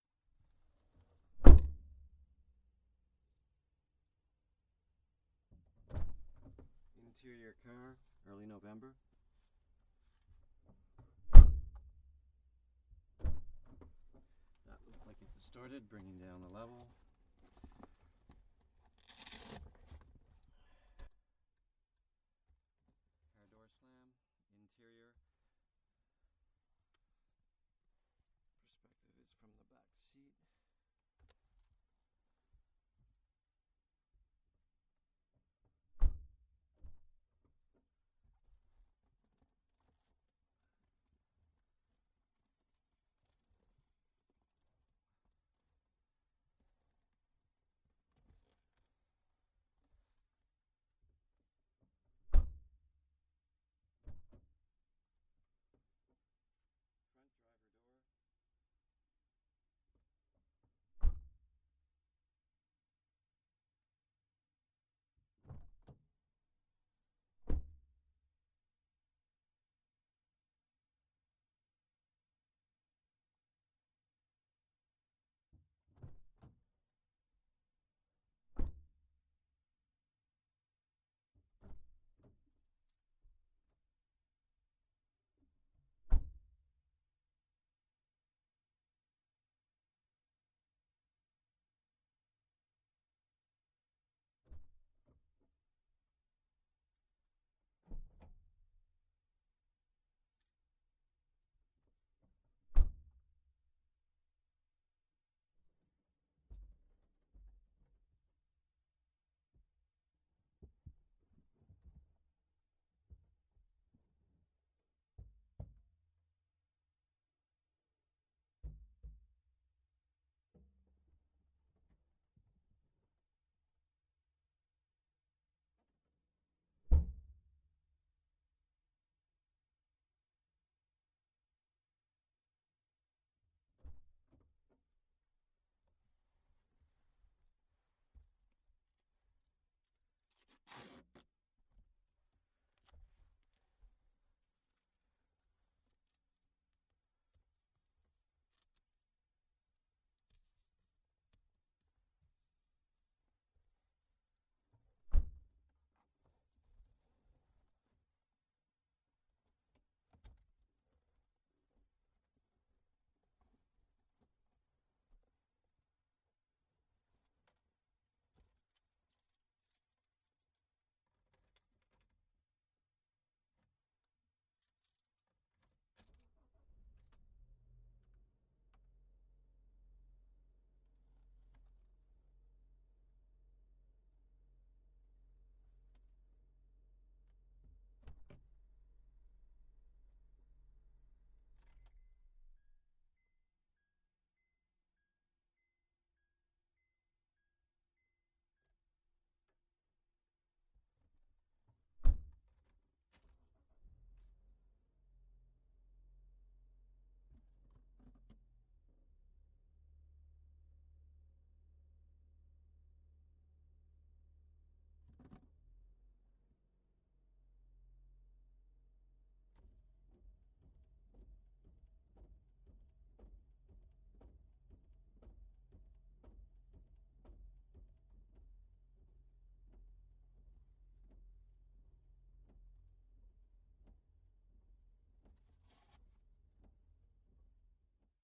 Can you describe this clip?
PVO INT CAR doors windows W
Please note to engage END FIRE in decode (the mic was pointed for on Z axis and not compensated for during record). Interior backseat POV. honda civic 2006. alternating opening and shutting doors and windows.
open, doors, windows, electric, auto, car, door, close, interior